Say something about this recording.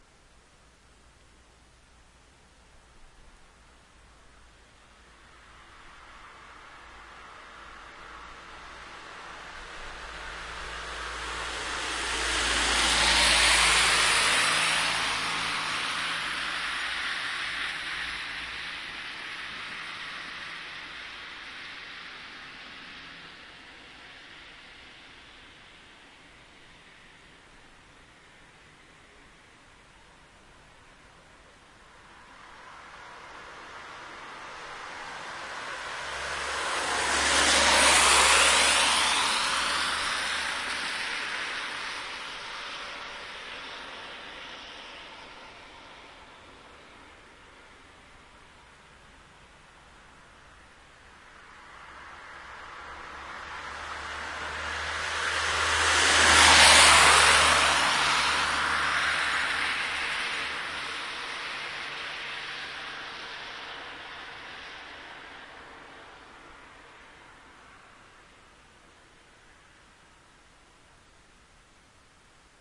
Recorder: SONY MD MZ-RH1 (Linear PCM; Rec level: manual 16)
Mic: SONY ECM CS10 (Phantom powered; Position: Next to street)
Three separate cars passing on the nearby wet (and cold) street
car, street, field-recording, passing-by